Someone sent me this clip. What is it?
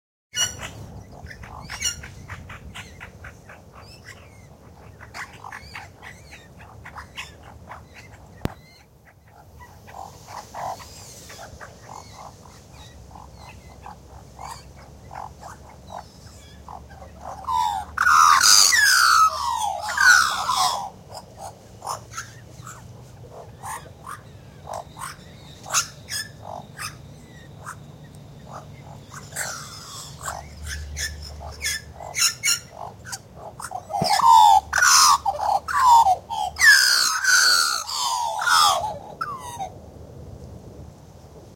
Australian Magpie
Magpie family group singing/calling in the morning in Kings Park Perth Western Australia. Magpies call in family groups to locate each other, acert dominance and too defend their territory.
Bird, Sounds, Magpie, Song, Field-recording, Call, Australian, Chorus, Morning